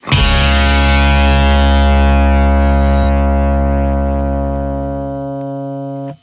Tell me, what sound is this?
13 C# death metal guitar hit
Guitar power chord + bass + kick + cymbal hit
bass, black, guitar, hit, blackmetal, deathmetal, drum, metal, death